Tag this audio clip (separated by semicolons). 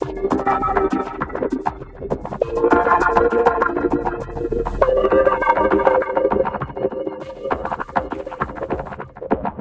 ambient; background; d; dark; dee-m; drastic; ey; glitch; harsh; idm; m; noise; pressy; processed; soundscape; virtual